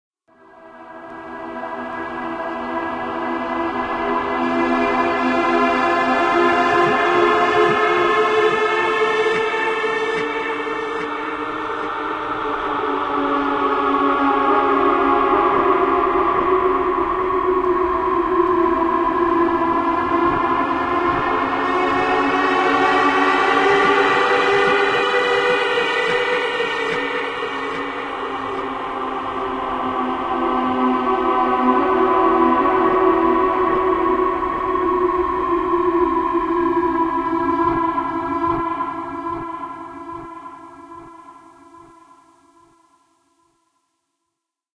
Trippy Dippy Fx
Guitar trippin on some psychedelics.
spaced, reverb, trippy, delay, guitar